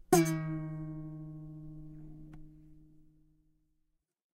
toy guitar perc 2
A pack of some funny sounds I got with an old toy guitar that I found in the office :) Hope this is useful for someone.
Gear: toy guitar, Behringer B1, cheap stand, Presonus TubePRE, M-Audio Audiophile delta 2496.